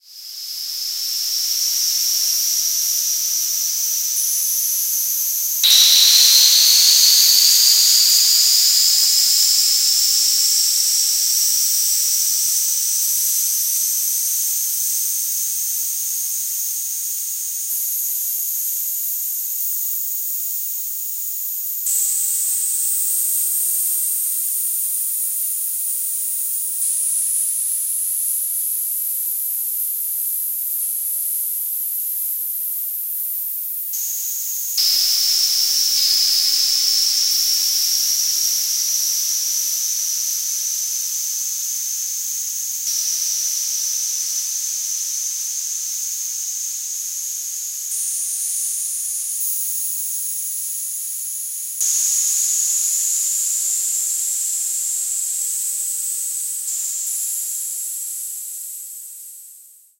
This sample is part of the "Space Drone 3" sample pack. 1minute of pure ambient space drone. Long noise bursts.
ambient, drone, reaktor, soundscape, space